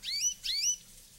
Simple canary chirping
There is some background noise
bird, canary, chirp, whistle